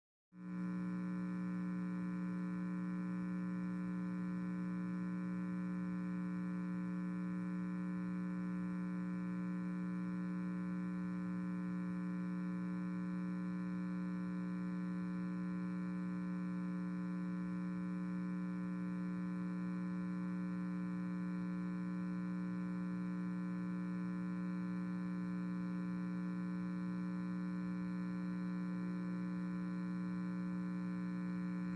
Speaker Buzz
electric, hum, hz, speaker